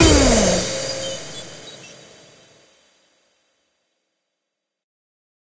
this series is about transition sfx, this is stacked sound effects made with xsynth,dex and amsynth, randomized in carla and layered with cymbal samples i recorded a long time ago
crash, cymbal, hit, impact, noise, riser, sfx, transition, white, woosh